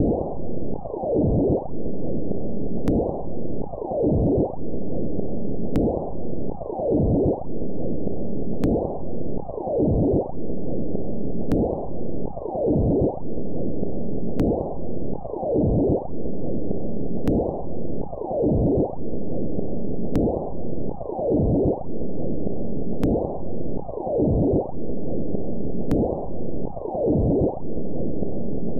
An effect heard in Mosquitmosphere 03... All sounds were synthesized from scratch.
insects, raw, silence, fx, atmosphere, hollow